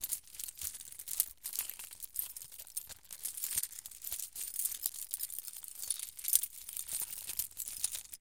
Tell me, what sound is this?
keys - rustling 03
rustle, keys, rustling, metal, metallic, jingle, clink